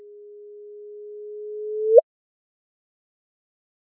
GW150914 template shifted
a theoretical template of the gravitational wave (with frequency shifted up 400Hz)
gravitational, pulse, simulated, wave